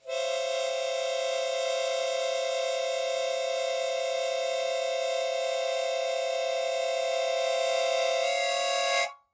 A chromatic harmonica recorded in mono with my AKG C214 on my stairs.